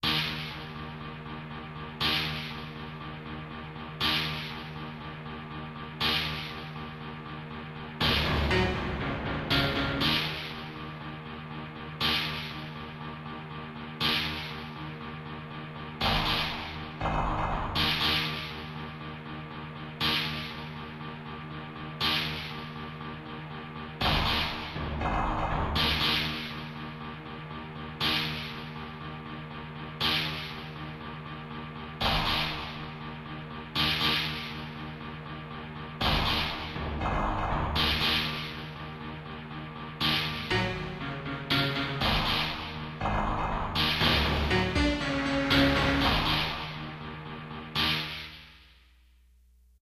Short electronic percussive piece with tension. Percussion concept recorded on a Roland MC-50 sequencer played through a Korg NX5R sound module. Recorded at 12:30 AM EST
anxiety, electronic, midi, percussion, tension